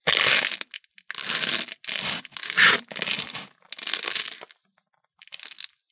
After the foot crushes the egg shell on the tile, it then proceeds to press while rotating back and forth, as if to make sure a bug is dead, or something. See the pack description for general background.